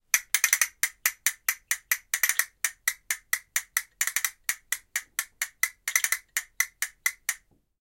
Castanets, Multi, A (H6 XY)
Raw audio of a pair of plastic castanets being played rhythmically. Recorded simultaneously with the Zoom H1, Zoom H4n Pro and Zoom H6 (XY) recorders to compare the quality. Thee castanets were about 1 meter away from the recorders.
An example of how you might credit is by putting this in the description/credits:
The sound was recorded using a "H6 (XY Capsule) Zoom recorder" on 11th November 2017.